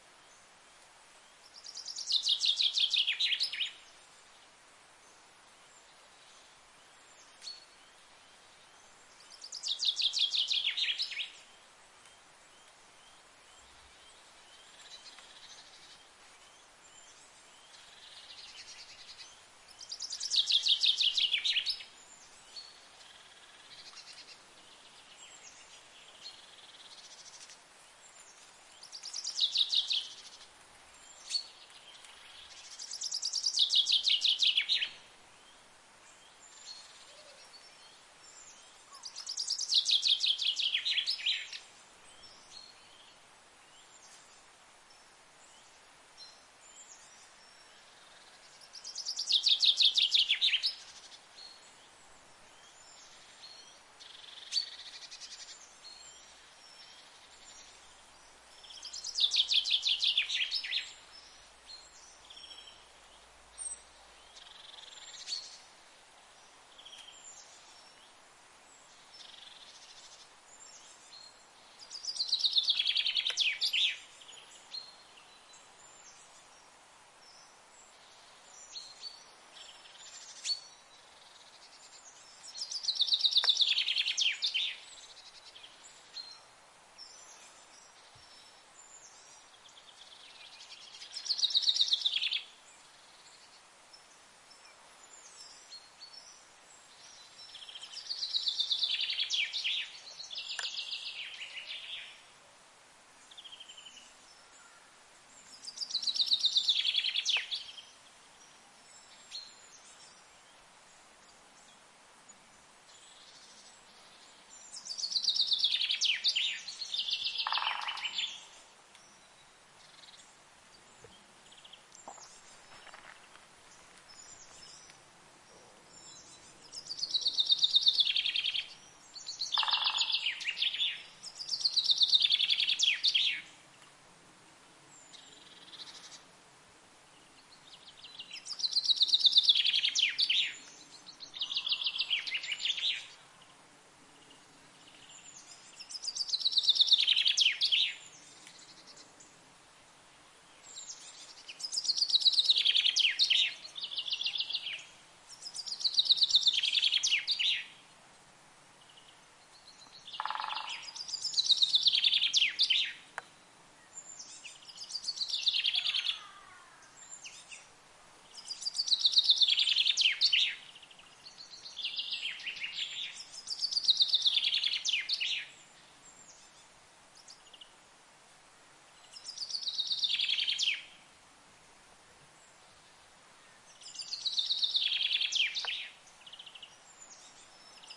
The chaffinch sings high in the tree.
Zoom h4n Pro
ambiance, ambience, ambient, bird, bird-feed, birds, bird-song, birdsong, bushes, chaffinch, chirp, chirps, field-recording, fink, forest, H4N-Pro, nature, soundscape, spring, tit, Tits, tweet, whistle, whistling, zoom